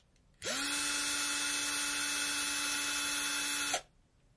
Drill Motor 27
drill motor, long high pitched burst.
machine, motor, drill